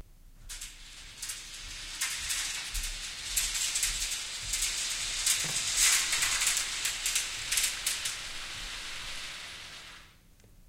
medium pull
this is the first of a medium speed pull of the scrim across the stage.
This is a recording of a person running across the stage pulling a scrim that was hung on a track so we could divide the stage. The sound was so distinctive that I decided to record it in case i wanted to use it for transitions and blackouts.
This is part of a pack of recordings I did for a sound design at LSU in 2005.
pull, curtain, scrim, metal, track